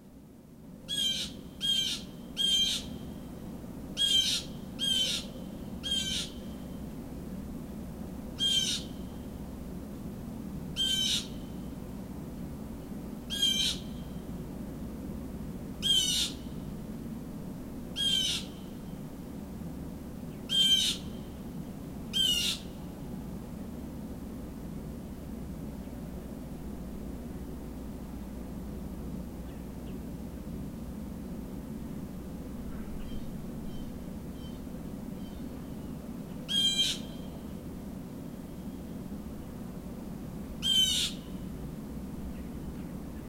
The mystery bird calls me LOUDER as I record with laptop and USB microphone.